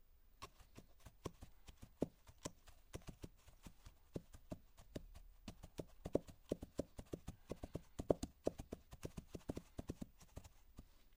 Hooves, Hard Muddy Surface / Layer 10
Microphone - Neumann U87 / Preamp - D&R / AD - MOTU
Coconut shells on a muddy, hard surface.
To be used as a part of a layer.